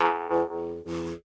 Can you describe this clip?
Jew's harp sigle hit
folk, jews-harp, folklore, lips, tongue, mouth-harp